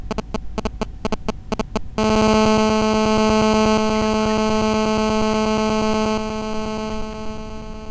Recording of cell phone interference in speaker.
fx, noise